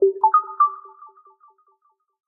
App Ui Sound
achievement, app, application, computer, machine, mobile, robot, windows